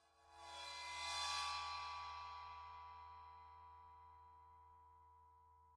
Medium suspended cymbal (16") played with a contrabass bow.